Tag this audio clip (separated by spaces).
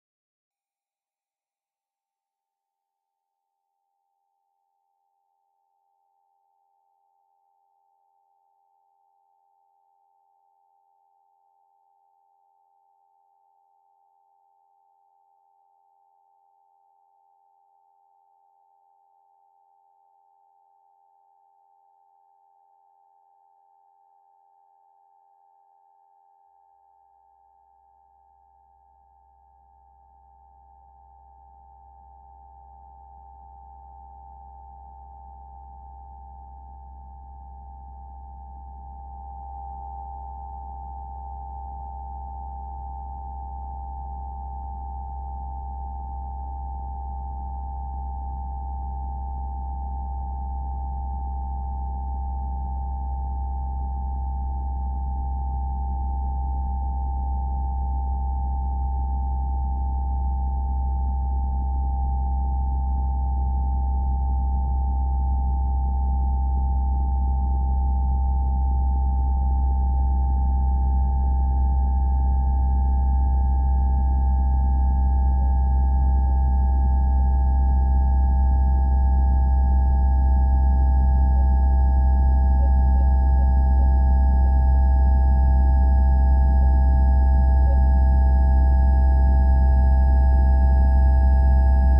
high increase pitch